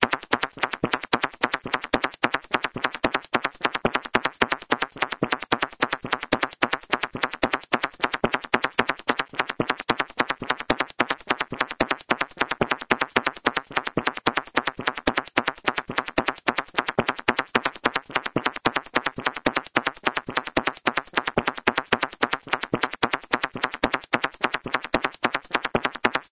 A little weird beat